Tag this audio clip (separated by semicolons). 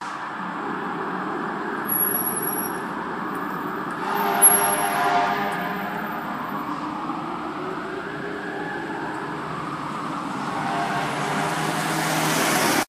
City; Siren; Sirens